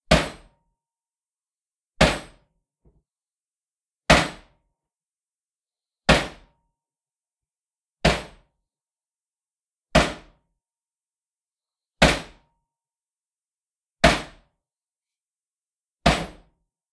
A series of hammer strikes against a metallic surface.